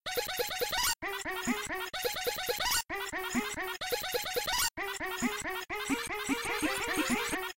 A Clever Cartoon Sound.
strings, cartoon